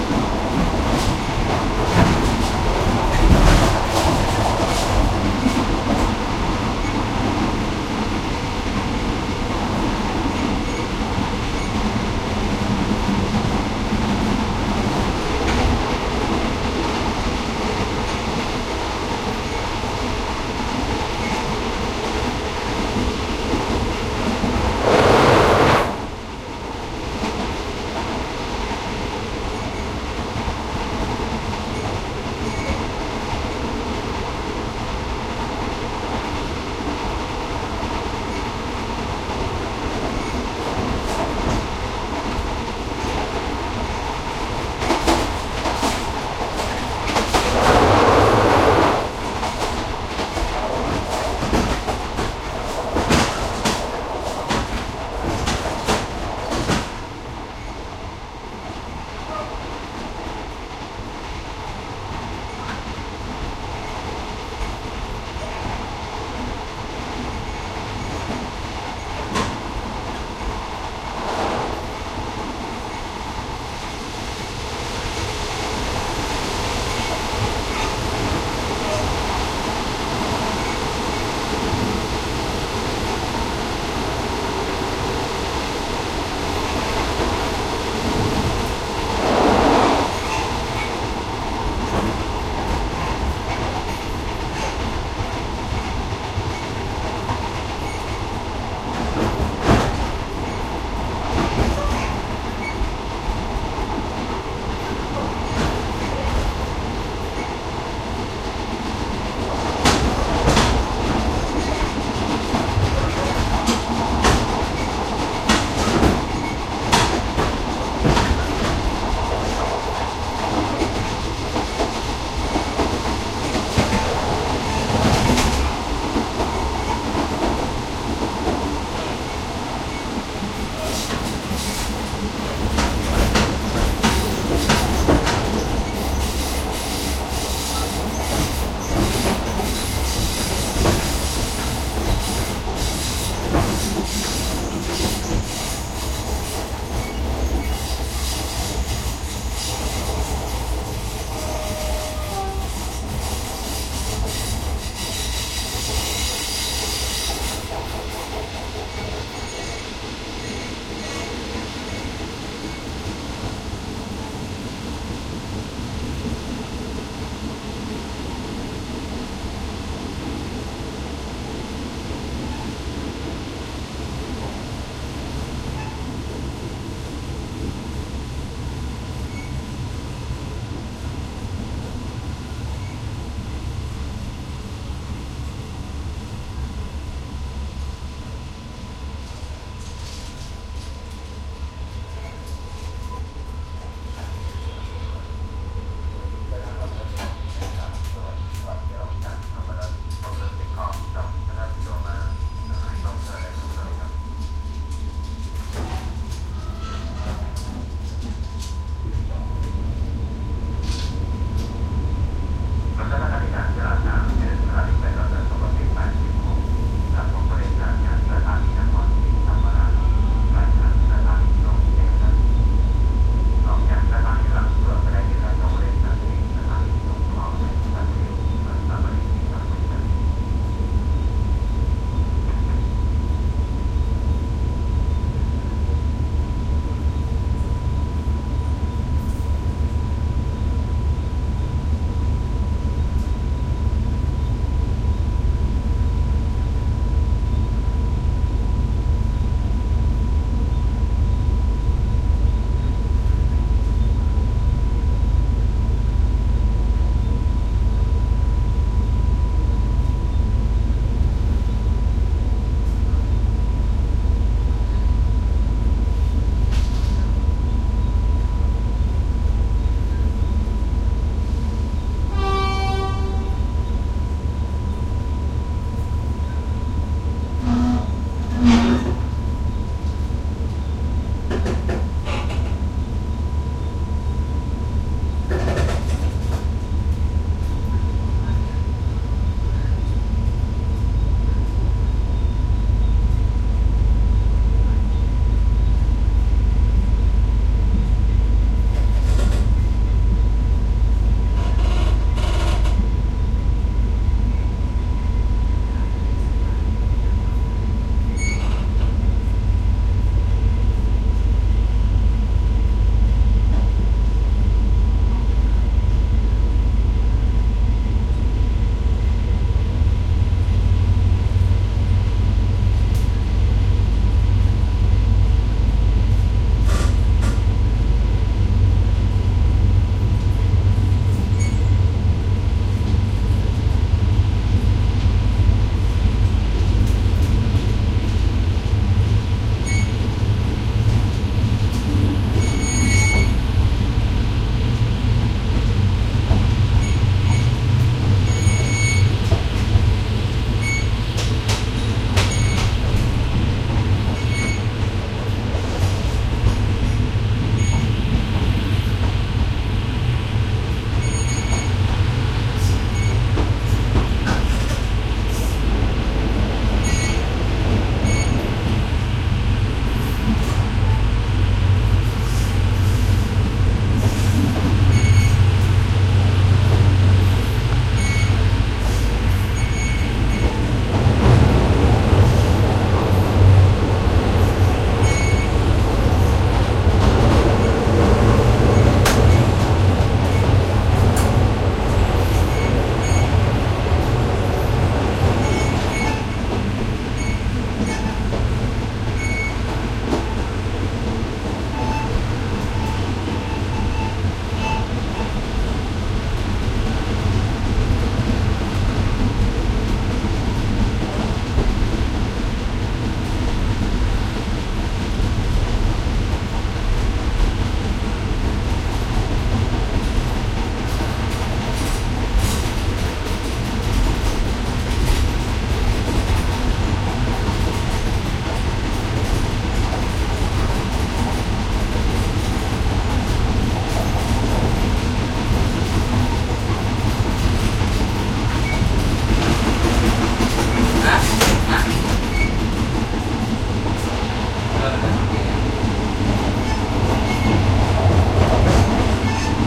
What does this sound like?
Thailand passenger train between cars train moving, start, stop (1st half good track movement with loud metal creaks, 2nd half bassier less with track movement)
between, cars, field-recording, moving, passenger, Thailand, train